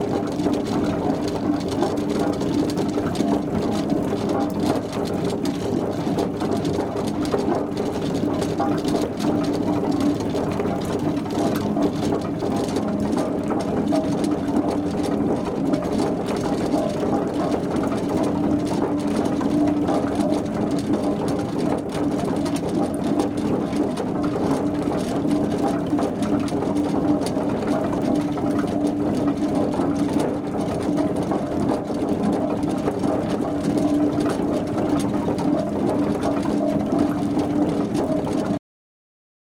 Water mill - loud single gear
These sounds come from a water mill in Golspie, Scotland. It's been built in 1863 and is still in use!
Here you can hear one loud gear coming directly from the mill wheel in the ground floor of the building.